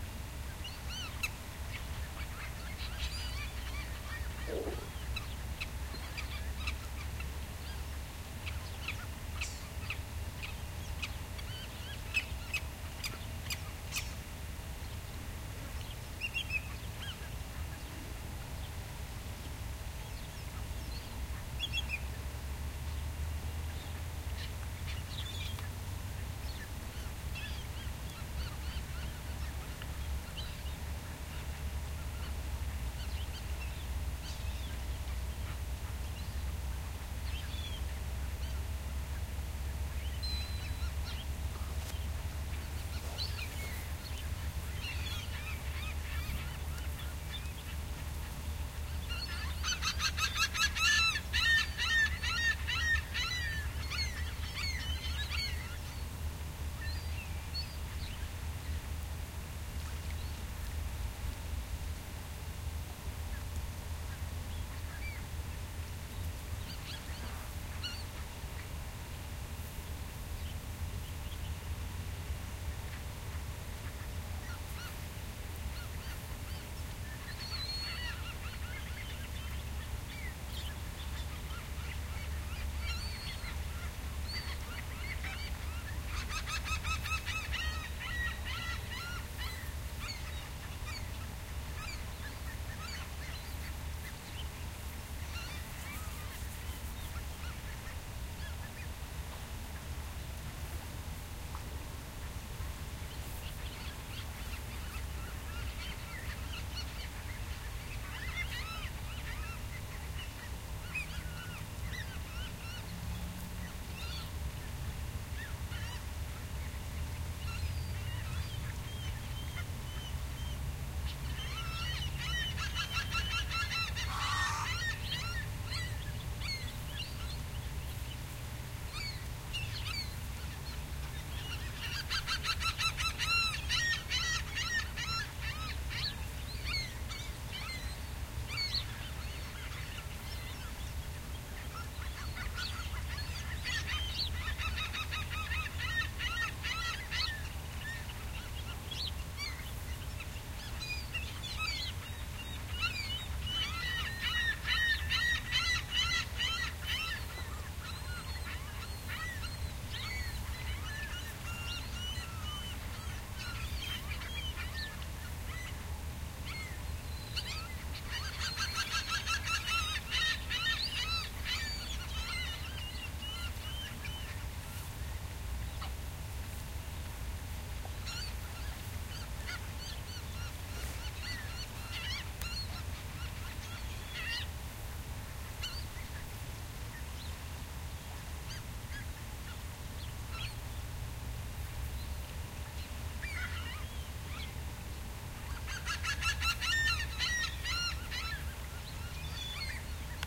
several species of birds calling near Ensenada de la Paz, Baja California Sur, Mexico. Recorded with two Shure WL183 mics, Fel preamp, and Olympus LS10 recorder
ambiance, screeching, beach, marshes, field-recording, mexico, seagull, birds